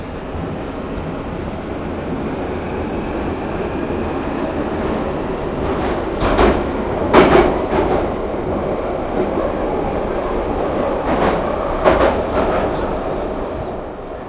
London underground 11 train leaving
Londin underground : a train departs. (Recorded inside the train, there's an open window).
london-underground, underground